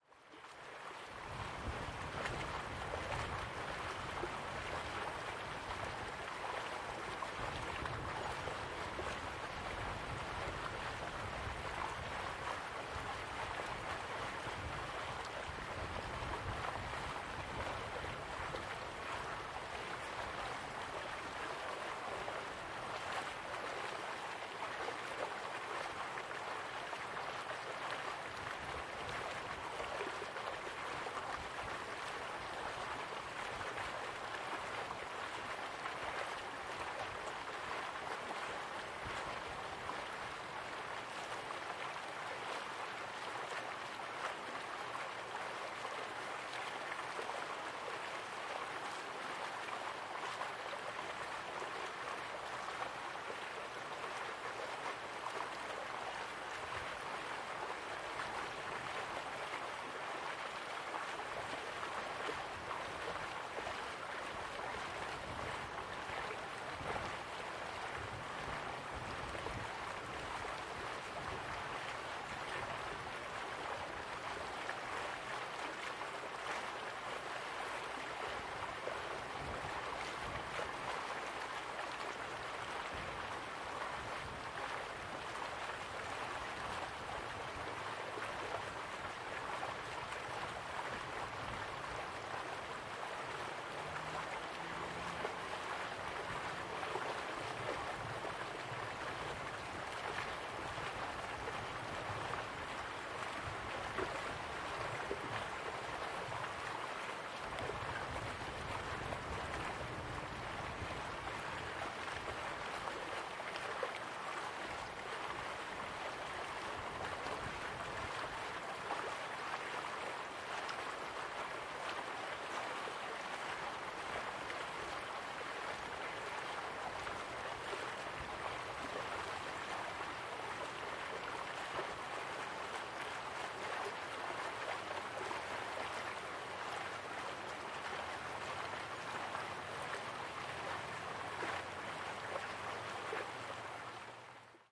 River winter heard above from foot-bridge
Recording of the almost-frozen Bow river in Banff, Alberta, Canada. Recording taken in winter, at night. Cold, some wind distortion. Recorded using the H2N zoom recorder on the M/S setting. High pass filtered, decoded to stereo.
Alberta, Banff, Bow, bridge, Canada, field-recording, ice, river, water, winter